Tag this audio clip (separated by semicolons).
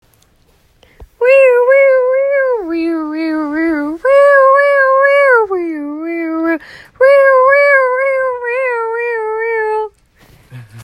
ambulance; cop